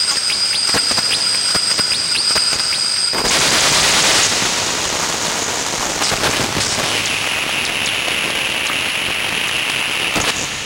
Freya a speak and math. Some hardware processing.
circuit-bent, glitch, speak-and-math